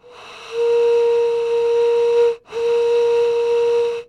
Jones Natural MostWater
The sound of blowing on one of the "Jones Naturals" bottles, about 3/4ths full of water.